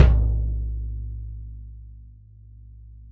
BD22x16-LP-O~v12
A 1-shot sample taken of an unmuffled 22-inch diameter, 16-inch deep Remo Mastertouch bass drum, recorded with an internally mounted Equitek E100 close-mic and two Peavey electret condenser microphones in an XY pair. The drum was fitted with a Remo suede ambassador batter head and a Remo black logo front head with a 6-inch port. The instrument was played with a foot pedal-mounted nylon beater. The files are all 150,000 samples in length, and crossfade-looped with the loop range [100,000...149,999]. Just enable looping, set the sample player's sustain parameter to 0% and use the decay and/or release parameter to fade the cymbal out to taste.
Notes for samples in this pack:
Tuning:
LP = Low Pitch
MLP = Medium-Low Pitch
MP = Medium Pitch
MHP = Medium-High Pitch
HP = High Pitch
VHP = Very High Pitch
1-shot, multisample, velocity